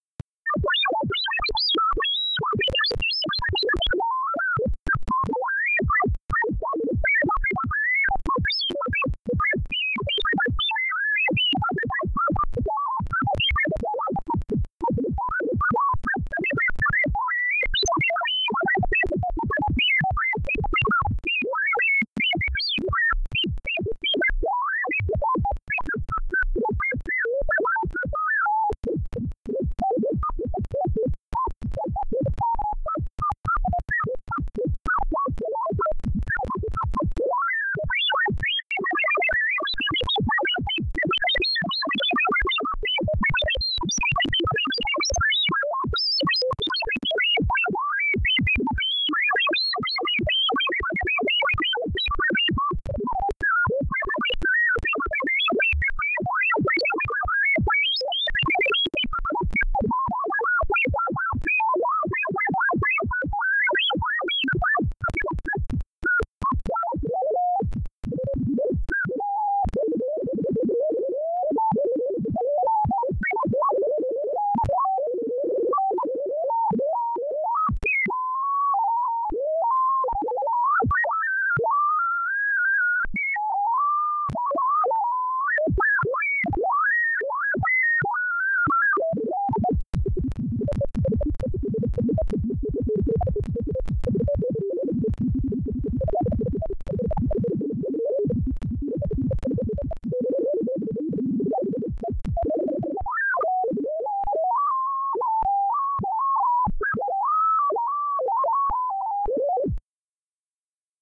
Robot kind of high pitch sounds
Robotic speaking gibberish sounds
talk, data, automation, mechanical, analog, droid, computer, command, machine, speech, bionic, galaxy, spaceship, electronic, robot, alien, android, intelligent, gadget, robotic, application, interface, cyborg, space, artificial